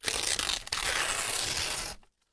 tearing paper 02
This is a sample from my sample pack "tearing a piece of paper".
breaking, tearing-apart, foley, tearing-paper, newspaper, tearing, journal, stereo, magazine, paper, noise, book, field-recording, destroy, tear, break